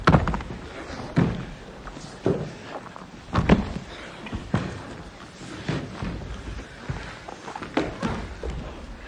24 dancers falling and twisting